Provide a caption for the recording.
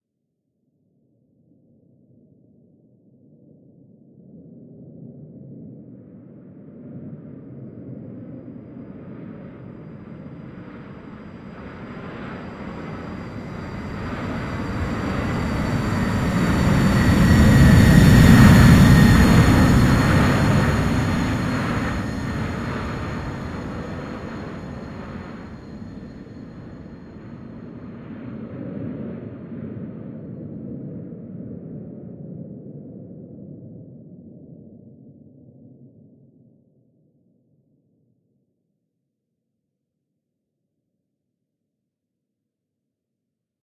Air liner.40 seconds fly by.Louder(9lrs,mltprcssng)
The sound of an airliner flying over. Created artificially. It is based on the sound of the wind recorded in the mountains. The rest of the sounds used in the creation: the sound of a hiss of a gas burner, a whistling sound obtained by synthesis, the sound of a home vacuum cleaner (two versions of this sound with different pitch), low-frequency noise obtained by synthesis. The sound of the wind is processed differently for each of the three main layers. There is a distant layer with a tail, a near layer, an upper layer and a near layer with a low rumble (there is wind and low synth noise in it). Each of these layers goes through a flanger. And the last, tonal-noise layer consists of the sounds of a gas stove burner, a vacuum cleaner and a synthesizer whistle). All filtering, changes in pitch in the tonal-noise layer, level control by layers, are linked to one XY macro controller and their changes are programmed with different curves of rise, time of arrival and decay.
flight, engine, fly-by, sounddesign, sound-design, artificial, cinematic, aviation, noise, flying, game, plane, field-recording, taking-off, aircraft, jet, aeroplane, airplane, sfx, sound, air-liner, fx